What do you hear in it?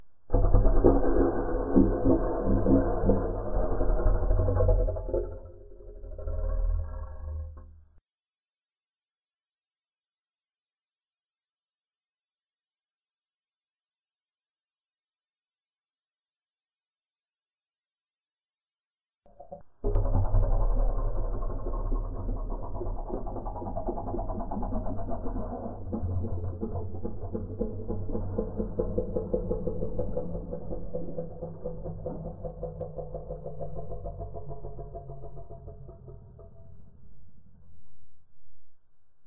Muffled Pipe Draining
Whilst being very uncreative and recording myself burp, I found some interesting sounds when changing the speed of the tracks.
muffled, oil, bubbling, water, sea, Pipe, plug, monster, rig, burp, gurgle, underwater